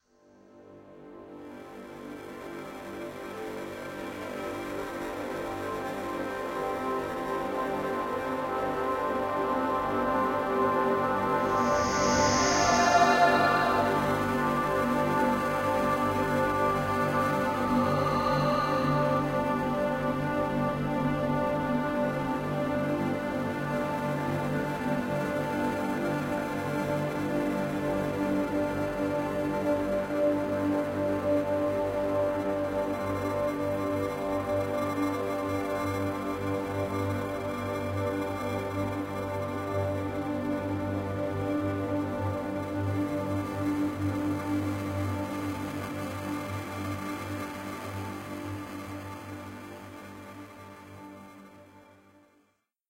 More Ambient sound experiments. Made with Synth 1 and effects from LMMS.
Ambient, Experimental